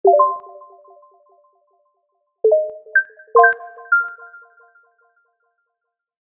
App Ui Sound
machine, GUI, artificial, robot, interface, computer, beep, data, android, game, windows, application, mobile, scifi, achievement, app, Ui